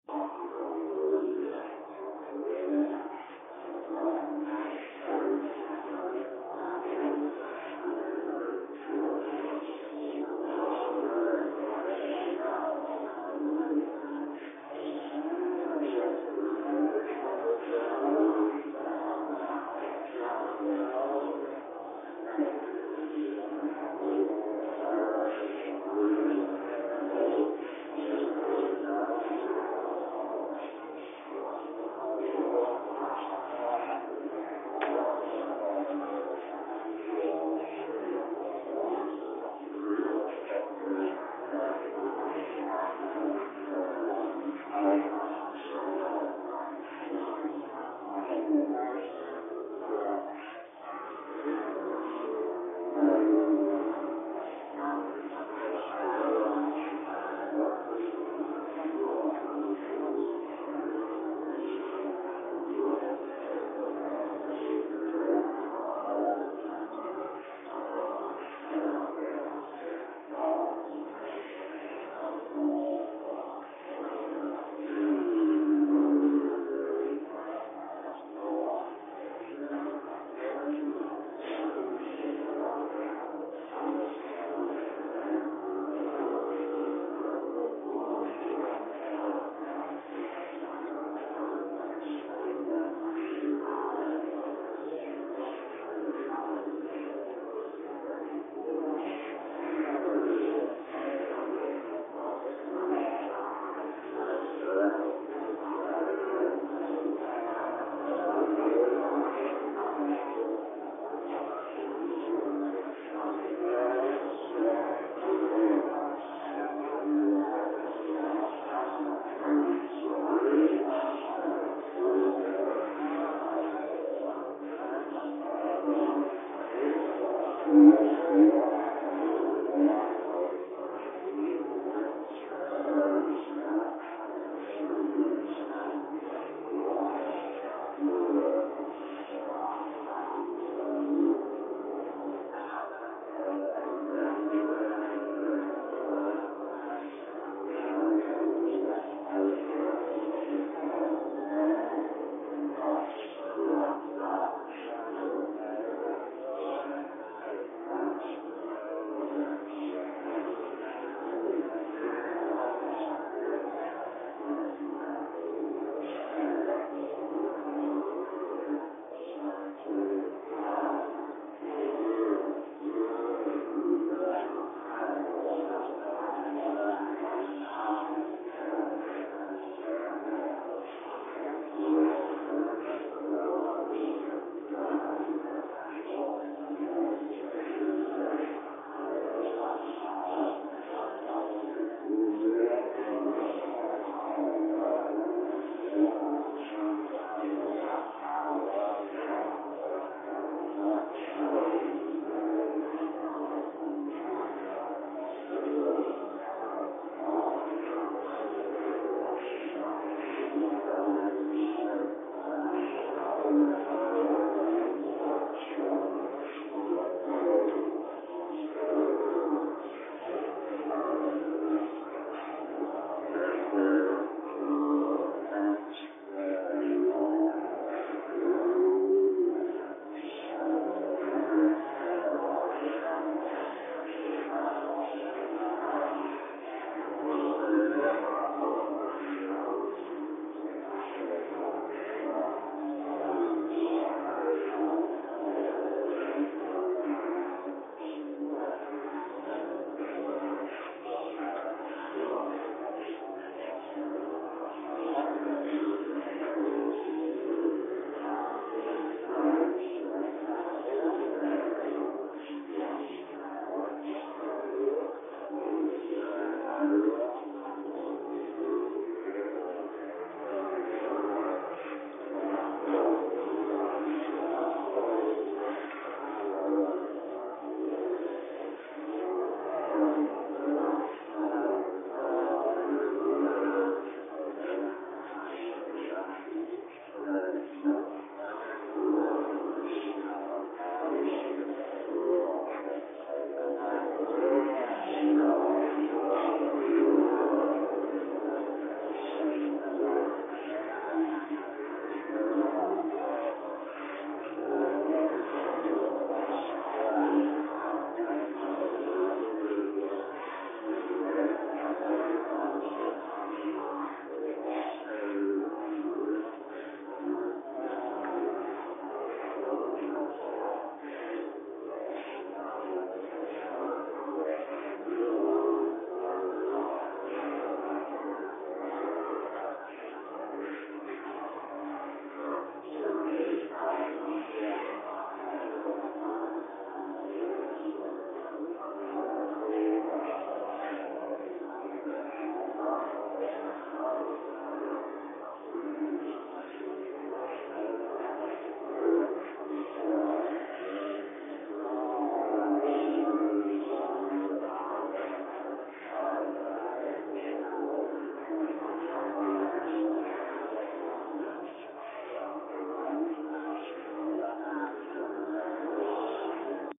I made this using a crowd talking file and edited it on Wavepad.